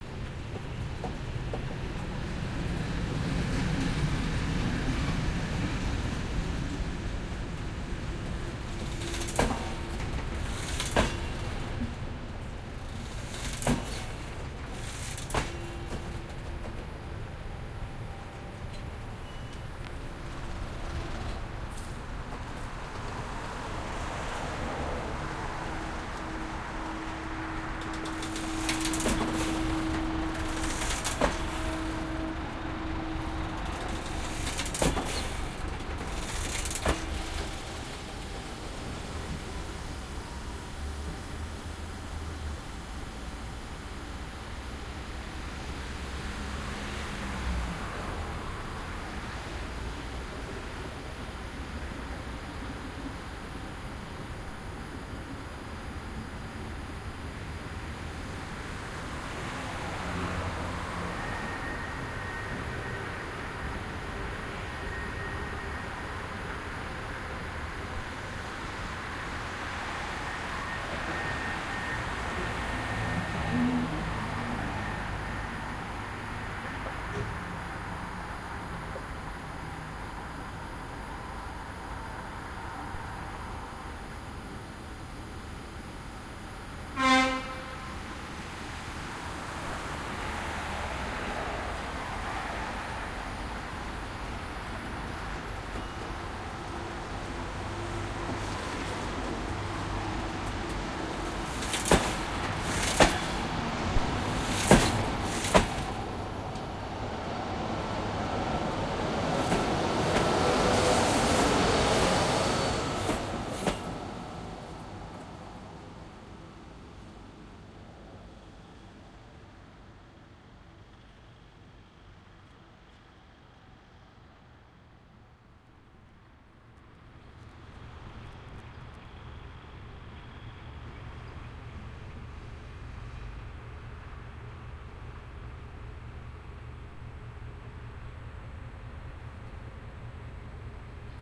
nigt atmosphere with close sounds of train in slow motion and some cars passing by. location - bratislava, slovakia